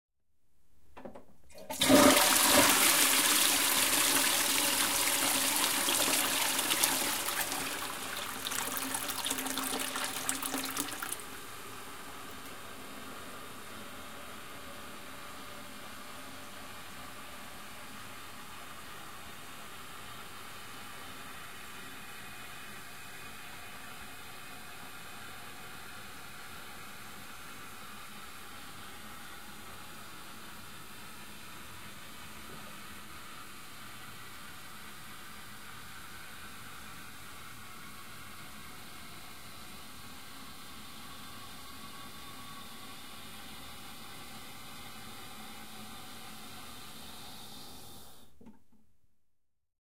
FX Bathroom Toilet WaterFlushing
Toilet, Water flushing.
flushing, water, toilet, bathroom